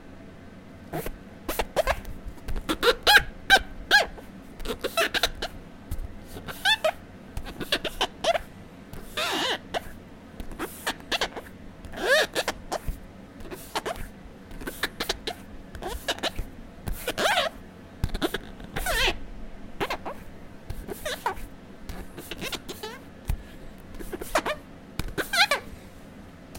Rubber Squeak!
Sliding my hand across a rubber surface to create squeaking. Recorded using an AKG Perception 120 in my home studio.
rubber, slipping, squeaking, slide, slip, squeak